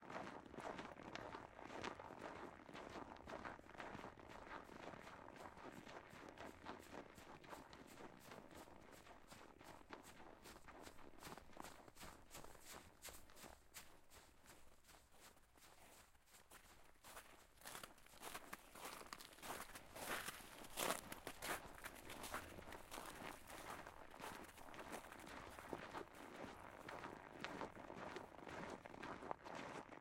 Snow Footsteps
people walking in the snow
people, footstep, walking